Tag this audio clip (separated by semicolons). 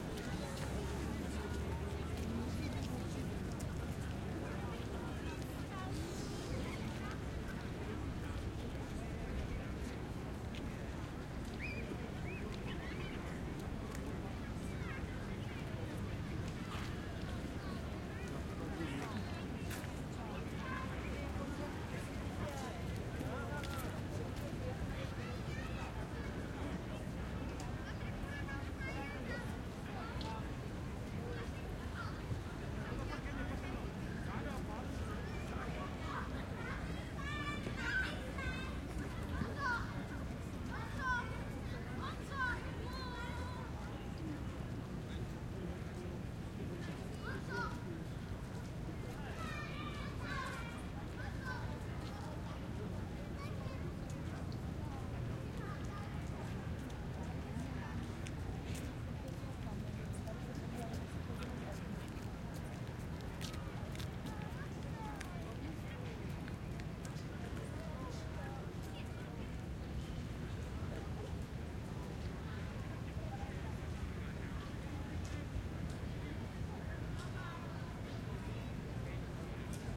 medium; urban; children; crowd; quiet